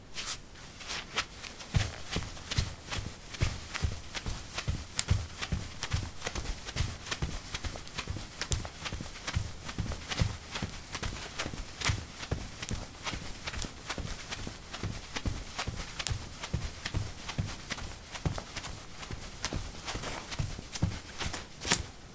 concrete 03c slippers run
Running on concrete: slippers. Recorded with a ZOOM H2 in a basement of a house, normalized with Audacity.
footsteps, step, steps